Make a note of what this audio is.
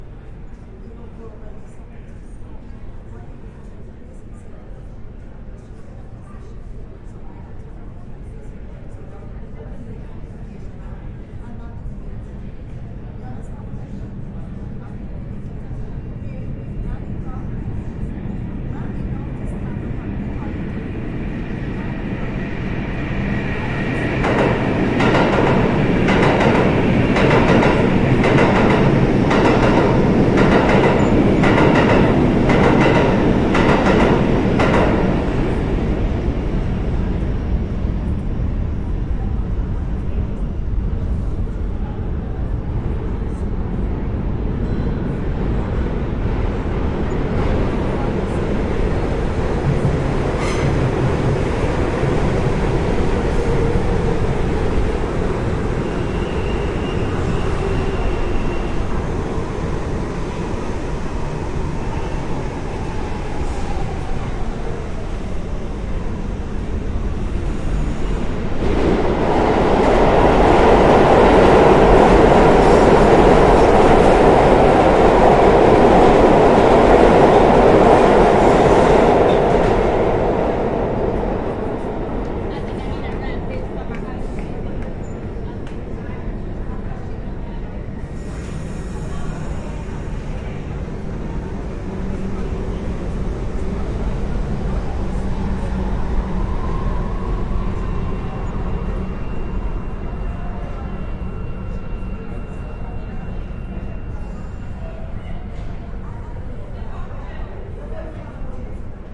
Subway Plarform Noise with a Number of Passing Trains
field-recording, nyc, train, subway, city, underground, new-york, passing